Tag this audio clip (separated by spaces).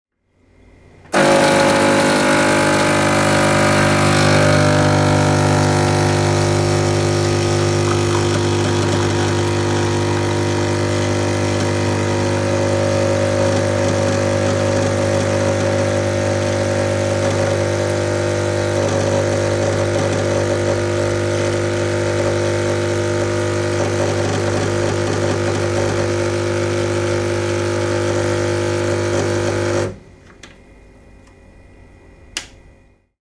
coffee espresso gritty machine